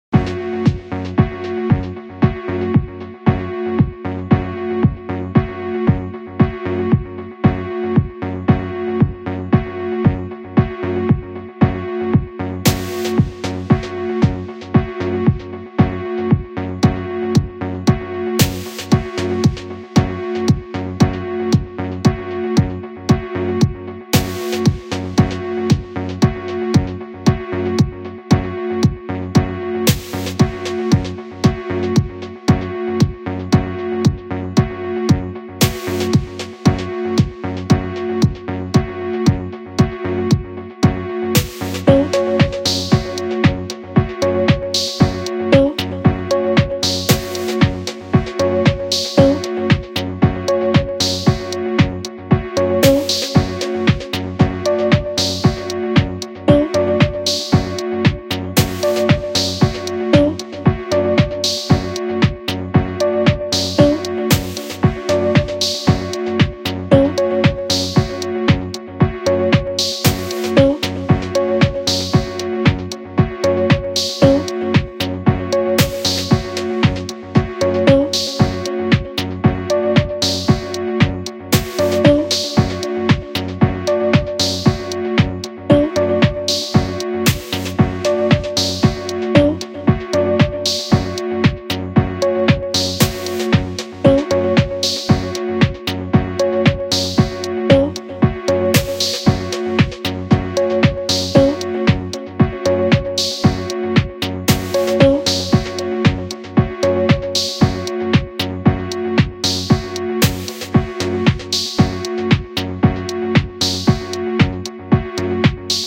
vox and bells . Electronic loop.
Synths:Ableton live,Silenth1,Kontakt,reason.
garbage, rhythmic, cleaner, house, Electronic, quantized, track, bells, music, groovy, original, loop, vox